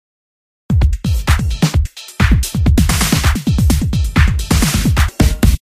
sample sund song loops